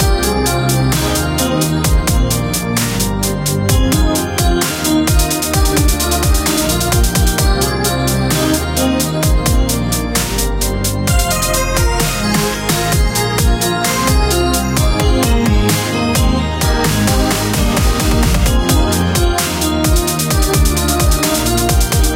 Once in a Dream 2
Nice Backing Track, Project Starter. Strings, Pipe Organ, Synth and Drums.
BPM, music, synth